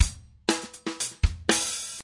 funk acoustic drum loops
acoustic, drum, funk, loops